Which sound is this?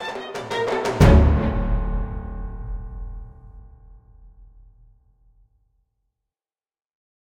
Game Over 8 (One wrong step)
A lot of effort and time goes into making these sounds.
Part of a piece of music I composed that I realized would work well for a game over jingle. I imagined hearing this when a character is climbing a mountain and makes a fatal misstep, but it could easily suit other purposes.
dylan-kelk, hit, orchestra-hit, audio-sting, game-over-theme, failure, dylan-kelk-sounds, dramatic-sting, dramatic-hit, game-over-music, climbing-fail, game-over, cinematic-hit, failure-sting, game-over-failure, lux-aeterna, sting, game-over-jingle